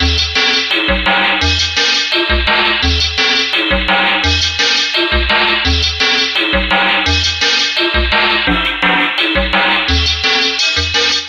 Random Sounds Breakbeat Loop
sounds, effected, breakbeat